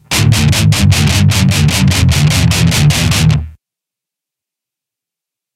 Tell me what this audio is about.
DIST GUIT 150BPM 3
Metal guitar loops none of them have been trimmed. they are all 440 A with the low E dropped to D all at 150BPM